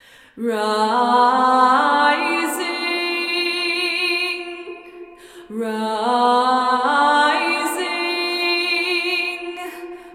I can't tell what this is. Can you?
"rising" vocal arpeggio in a minor
A clip of me singing "rising" in an A minor arpeggio. This is part of a vocal track for a song of mine.
Recorded in Ardour with the UA4FX interface and the the t.bone sct 2000 mic.
Details (for those of you who want to use this in a mix):
The original song is in A minor, 6/4 time, 140 bpm and clip fits accordingly.
rising-vocal, arpeggio, rising, female-vocal, A-minor